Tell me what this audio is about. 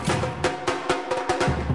thats some recordings lady txell did of his percussion band "La Band Sambant". i edited it and cut some loops (not perfect i know) and samples. id like to say sorry for being that bad at naming files and also for recognizing the instruments.
anyway, amazing sounds for making music and very clear recording!!! enjoy...